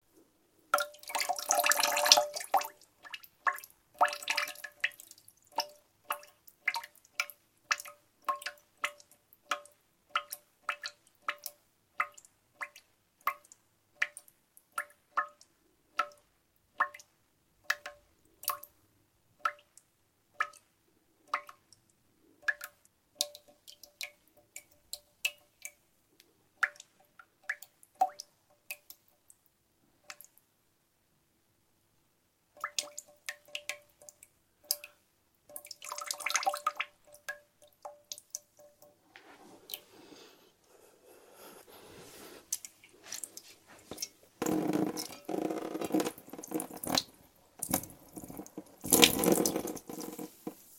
Set of water sounds we made for our sound library in our studio in Chiang Mai, North Thailand. We are called Digital Mixes! Hope these are useful. If you want a quality 5.1 or 2.1 professional mix for your film get in contact! Save some money, come to Thailand!